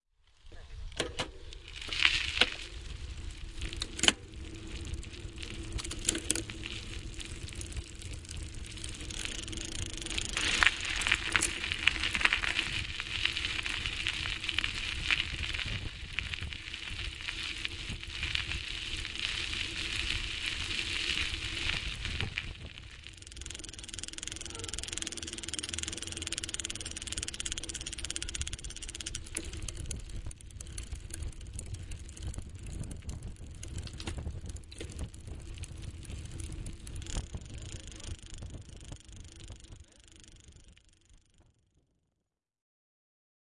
Bike ride
This is a mountain bike subjective field recording.The micro is in the cycle.micro: SONY stereo. minidisc: SONY MZ-N910 analog transfer to PROTOOLS for the edit.place: Riumors, CATALONIA, SPAIN
bicycle, bike, dirt